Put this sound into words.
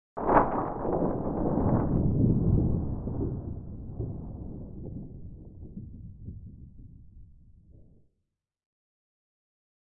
Thunder sound effect. Created using layered sound of rustling baking paper. Paper was pitched down, eq'd and had reverb added.
lightning, nature, storm, thunder, thunder-storm, thunderstorm, weather